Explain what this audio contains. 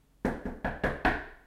door multiple knocks medium
Multiple medium knocks on a front door.
door, front-door, knocks